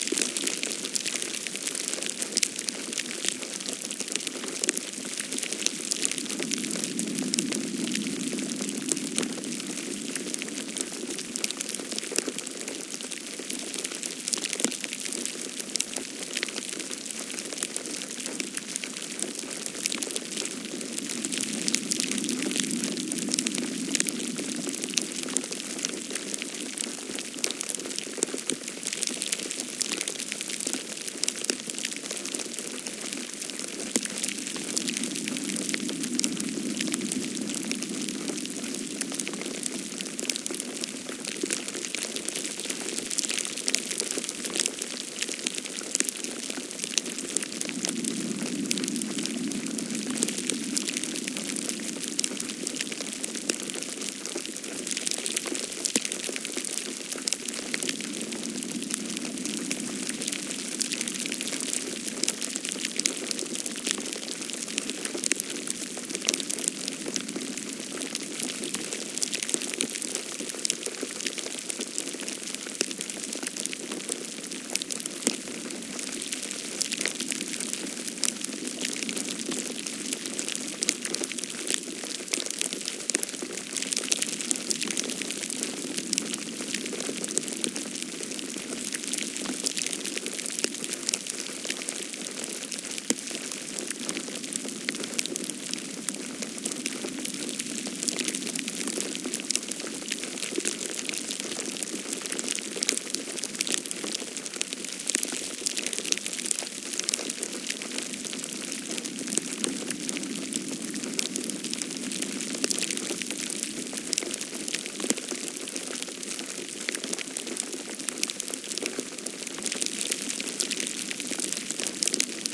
Snow falling in the Scottish borders